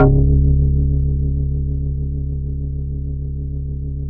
House Organ C#1
A multisampled house organ created on a shruthi 1 4pm edition. Use for whatever you want! I can't put loop points in the files, so that's up to you unfortunatel
Happy-Hardcore, Multisampled, Organ